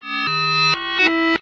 Guitar ring fx 1
Guitar with ringmodulater
fx, guitar